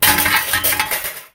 bicycle crash 5
Recorded for a bicycle crash scene. Made by dropping various pieces of metal on asphalt and combining the sounds. Full length recording available in same pack - named "Bike Crash MEDLEY"